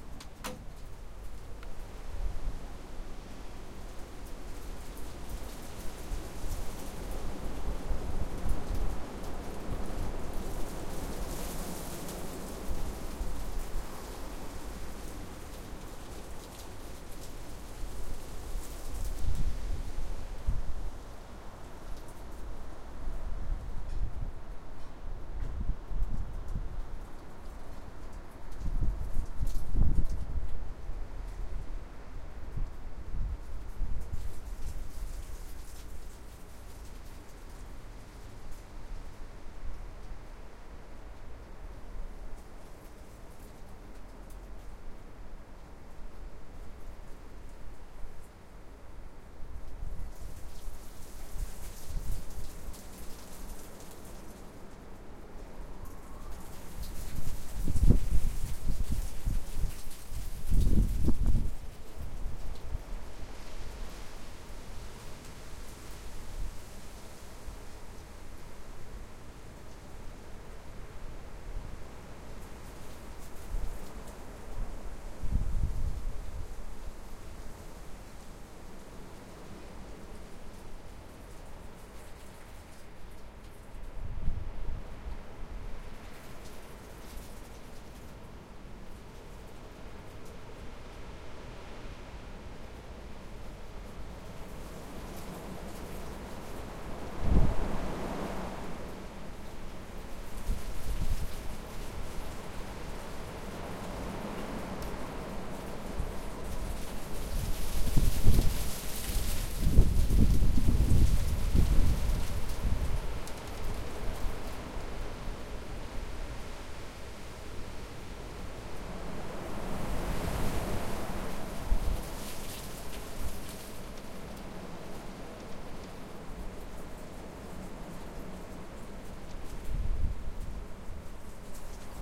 Windforce 4-5 wind
I opened the door and stood in the doorway to record the wind. You hear leaves rustling on the floor and every now and then the wind accidentally gets into the mic as I don't have a wind screen. You hear a little howling as well as some squeeking noise through it.
weather, leaves, Windforce, outside, four, stormy, rustling, wind, five